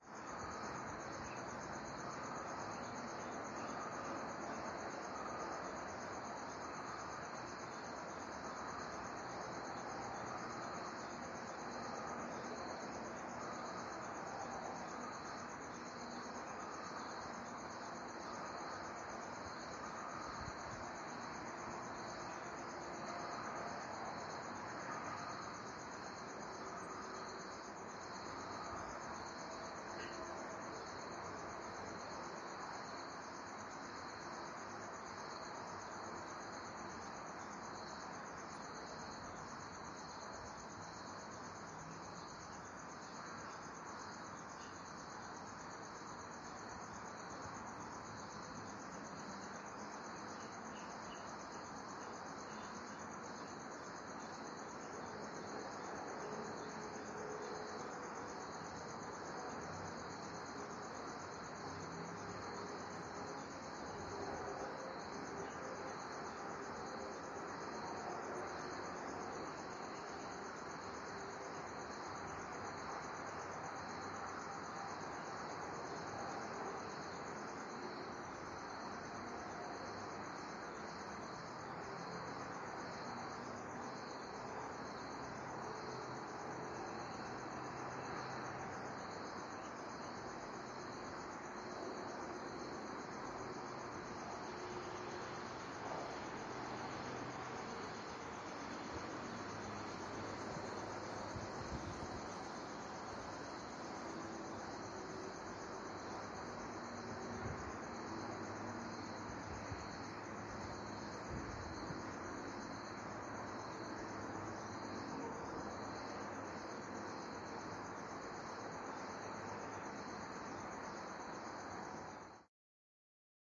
A late night ambience recording in Pretoria, South Africa. Cars passing by and crickets are audible.